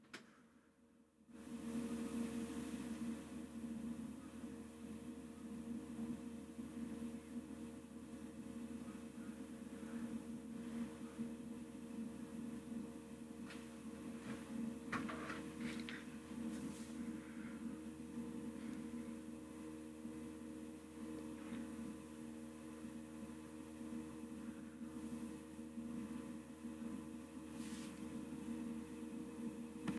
PC-fan
This is the sound my computer's fan made, recorded with my laptop. Apparently, this fan is crapping out and goes haywire after less than ten minutes. I will have to get a new one, but the sound may be useful for people who need examples of a loud, broken PC fan. Again, recorded with laptop, not best quality.
Please leave feedback in the comments.
fan, pc